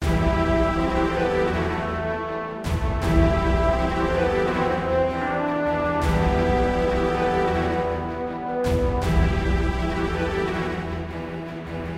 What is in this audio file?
Epic SoundtrackActionChase
This music clip can best be used as an action movie or gaming sequence. Very dramatic and intense. Was made with Music Maker Jam.